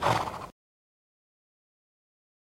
Horse(snort) 3
horse, snort